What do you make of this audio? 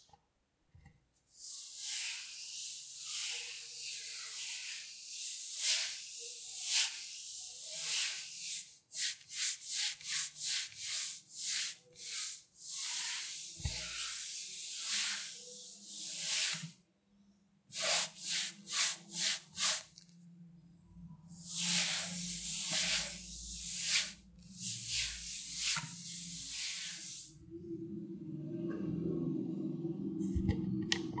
Another version of my soft cloth cleaning recording. Gear: Zoom H4n